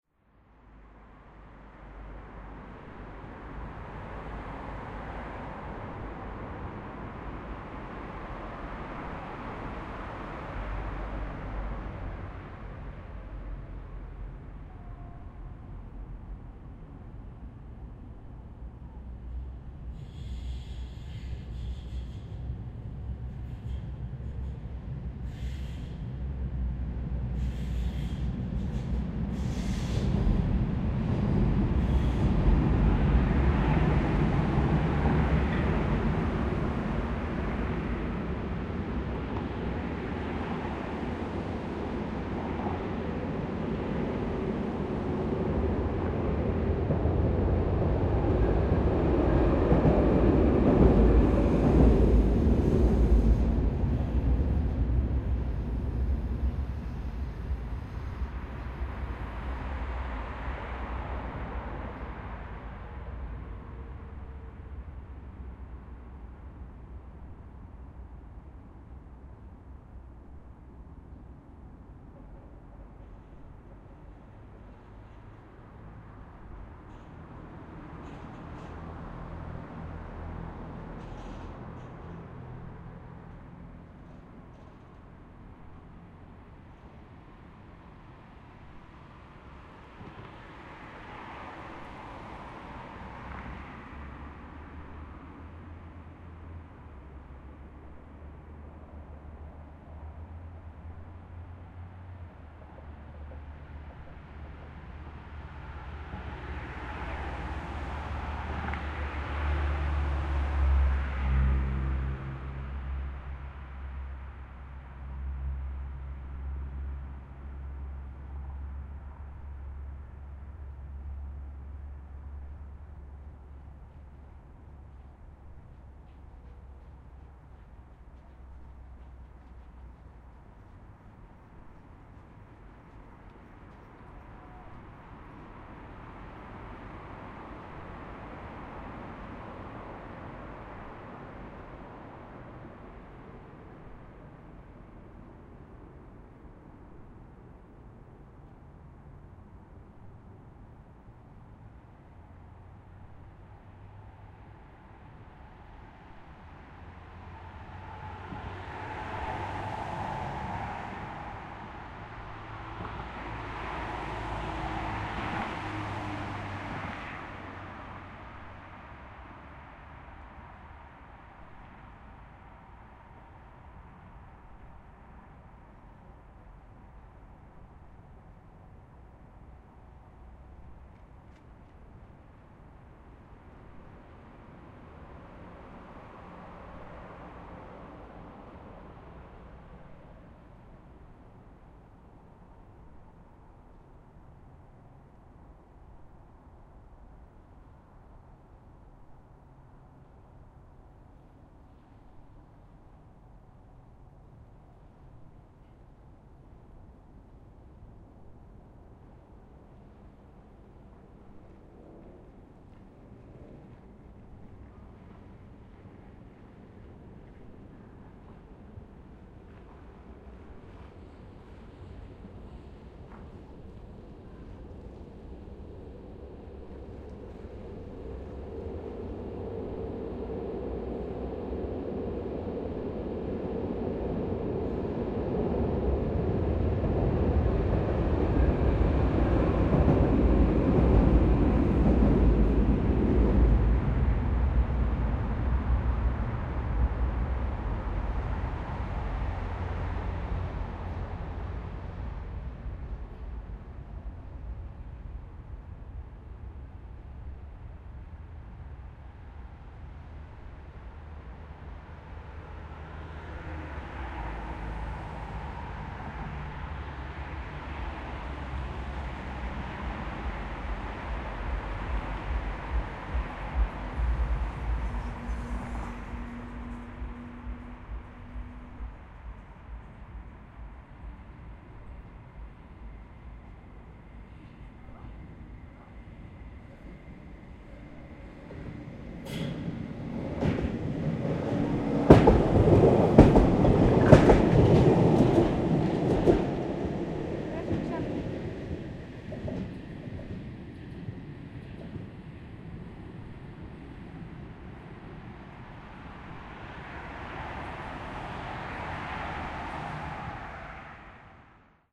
180515 al. wielkopolska ambience
18.05.2015: around 10.30 p.m. Fieldrecording made on Al. Wielkopolska in Poznań (Poland). Near of the tramway flyover. Noise of traffic (cars, passing by trams). Recoder: zoom h4n with internal mics.
ambiance, cars, fieldrecording, noise, poland, pozna, road, traffic, trams